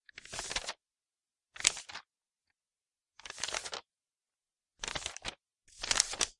Rustling Paper
The movement/rustling of paper being handled. Could also be used as a page turning.